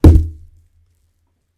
Some gruesome squelches, heavy impacts and random bits of foley that have been lying around.
gore, vegtables, splat, blood, violent, foley